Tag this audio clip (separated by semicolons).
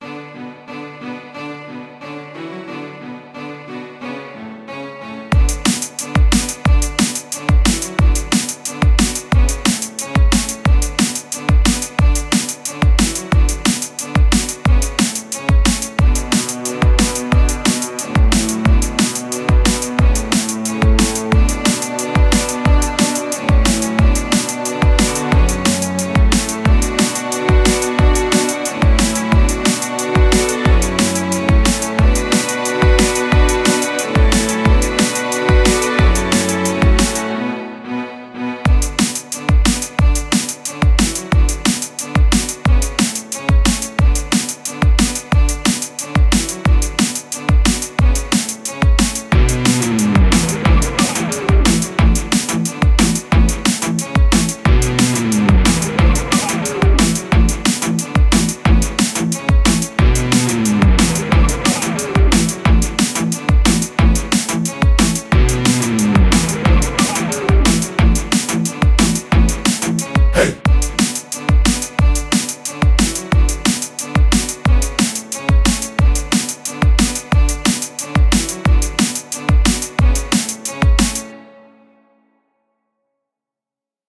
music; Video-game